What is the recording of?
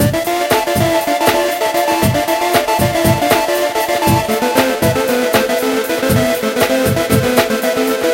Electronic-symphony-synth-loop-112-bpm
electro, techno